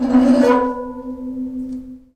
Metal Rub 5
Rubbing a wet nickel grate in my shower, recorded with a Zoom H2 using the internal mics.
resonant, nickel, metal, rub